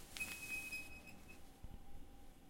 Shower knob turning on